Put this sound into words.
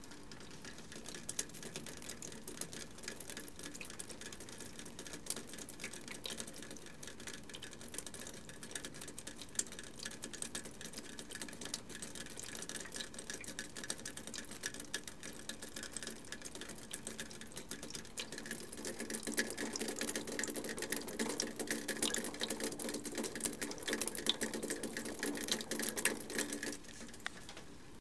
water dripping from gutter to gutter
Water dripping from one gutter to another.
night, rain, water, wet, dripping, gutter